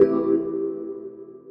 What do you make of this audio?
click sfx2
This is a pack of effects for user-interaction such as selection or clicks. It has a sci-fi/electronic theme.